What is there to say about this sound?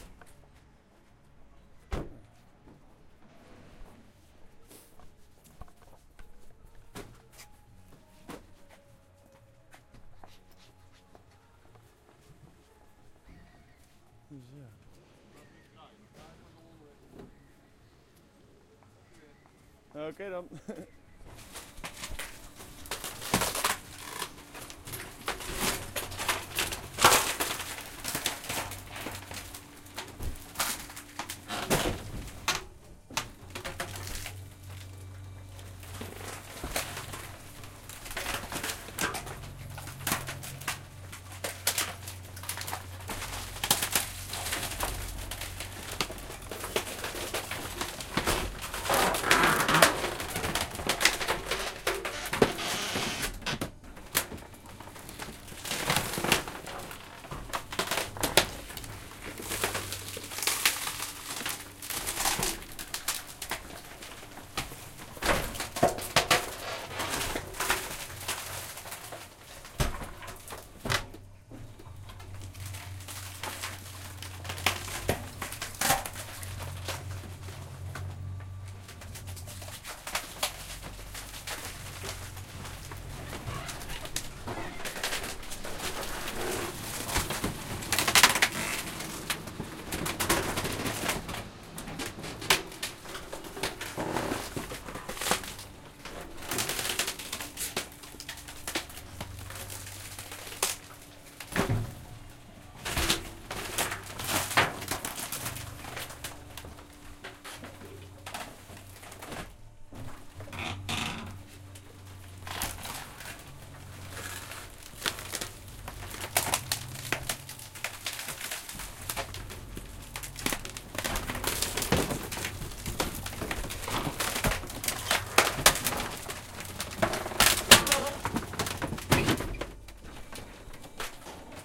a machine that crushes all the garden waste(mostly logs and twigs and plants) at the garbage disposal in Hilversum, the Netherlands
wood, twiggs, snapping, machine, crunched